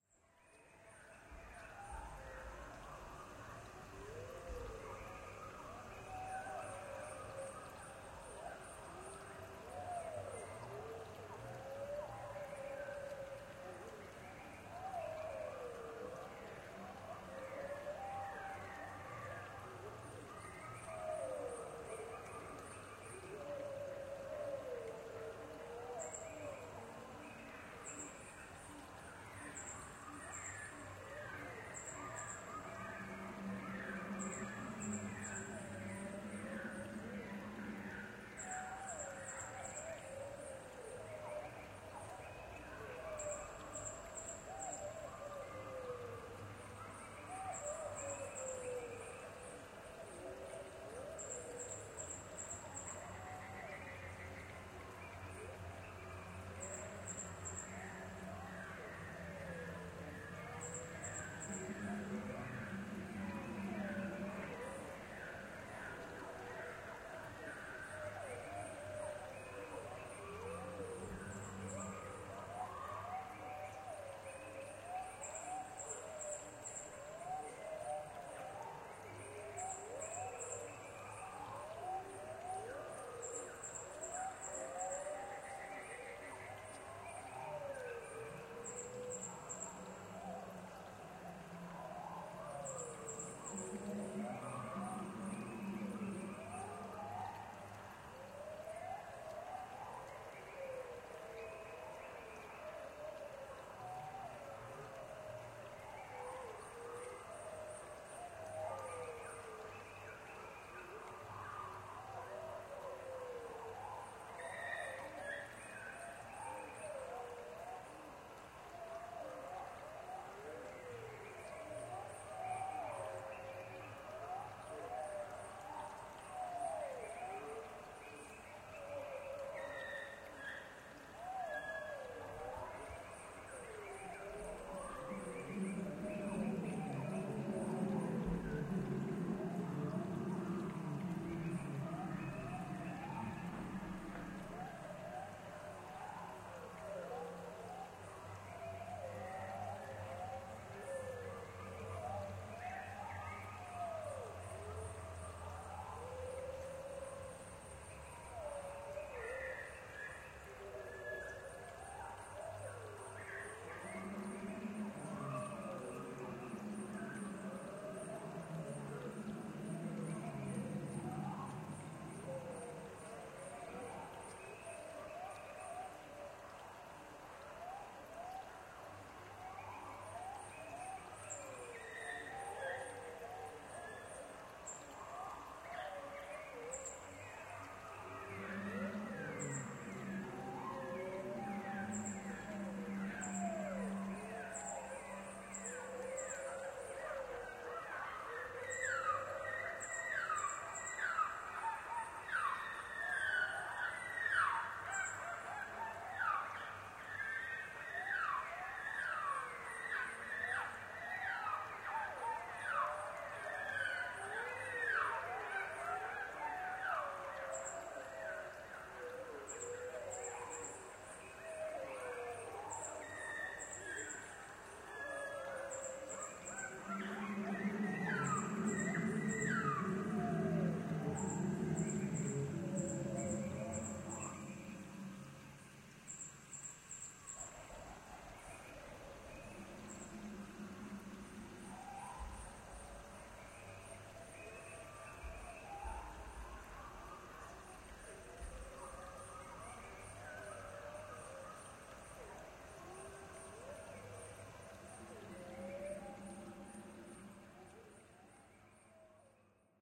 Prehistoric Marsh with Birds, Insects and Reptilian Creatures
Simulation of a prehistoric swamp/marsh with birds, insects and large reptilian creatures.
birds, daytime, dinosaurs, marsh, nature-ambience, pond, prehistoric, swamp